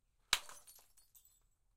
Bottle Smash FF128
1 light, high pitch beer bottle smash, tap, hammer, liquid-filled
Bottle-Breaking, Bottle-smash, light